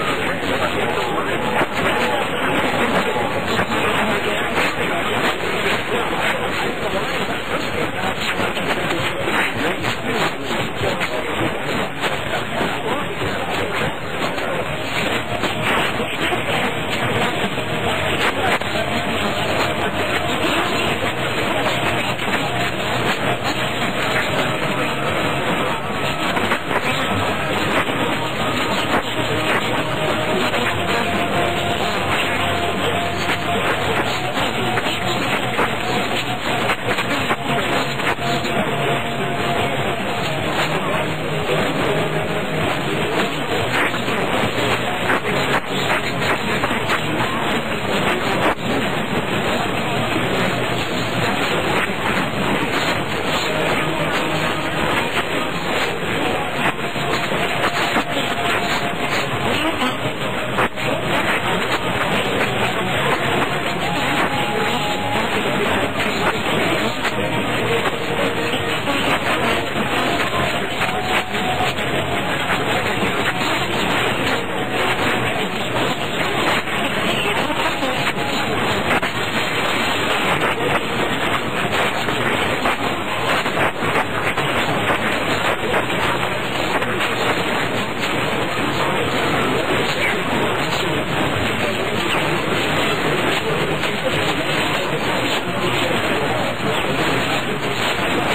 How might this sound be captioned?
Picking up multiple frequencies
Some AM band recording of a mix-up of different stations.
multiple, frequencies, radio, stations